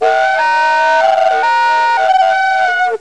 This sample appears to be misnamed. I believe it is a wooden trainwhistle with 3 sound columns so that it can play a chord. Recorded at 22khz
whistle, wind